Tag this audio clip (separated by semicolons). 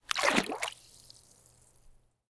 flow; pool; small; splash; swirl; swirling; swooshing; water